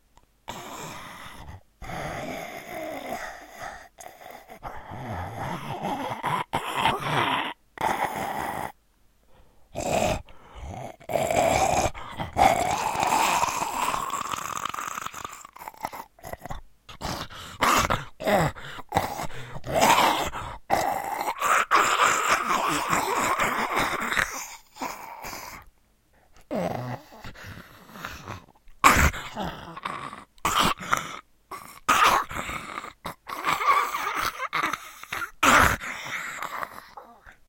Zombie freak biting
Zombie, biting, freak